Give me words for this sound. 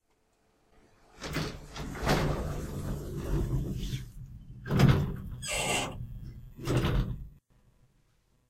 An elevator closing its doors. Recorded with a Zoom H2. Recorded at Campus Upf classrooms.
UPF-CS13, doors, elevator, squeak, close, campus-upf, machine